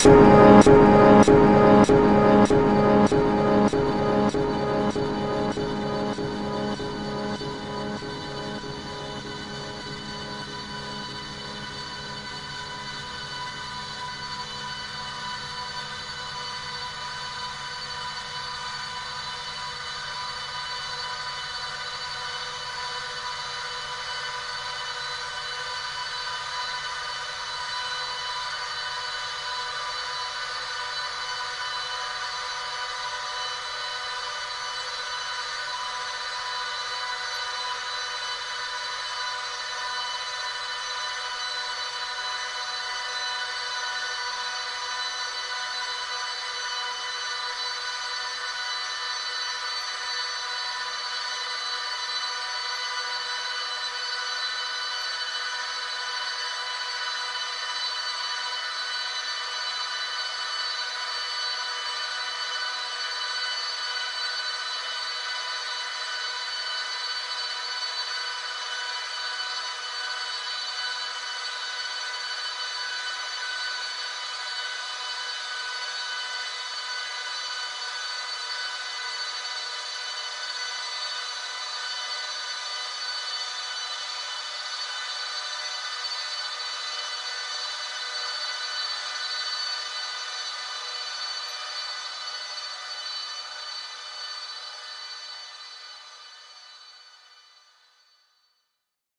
Sound squeezed, stretched and granulated into abstract shapes
ambient, abstract, granular, drone, noise